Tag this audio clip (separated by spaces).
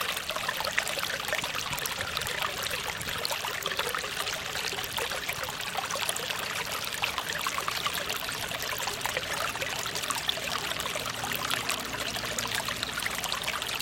River Water Nature waterfront naturesounds